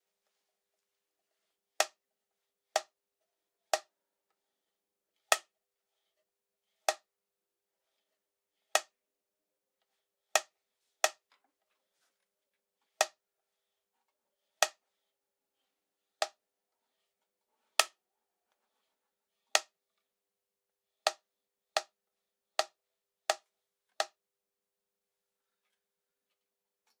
Slate board: Slate board clapping, clapping is harsh and fast. Recorded with a Zoom H6 recorder using a stereo(X/Y) microphone. The sound was post-processed in order to enhance sound (subtle compression and EQ).
Slate-board, OWI